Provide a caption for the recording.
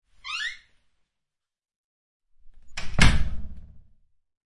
Door Closing
This is simply a recording of a door being closed, ideal for foley purposes. Enjoy :)
Close, Closing, Door, Shut, Slam